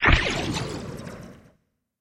Gun Cannon
Perfect for bringing the ultimate immersion into glorious space adventures!
A collection of space weapon sounds initially created for a game which was never completed. Maybe someone here can get more use out of them.
pulse, missile, torpedo, laser, quark, weapon, space, phaser, explosion, particle, neutron, gun, tachyon